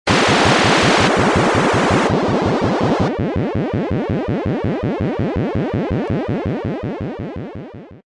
eventsounds3 - PAC!5
This Sound i made half a year ago in Psycle (freeware)
application, bleep, blip, bootup, click, clicks, desktop, effect, event, game, intro, intros, sfx, sound, startup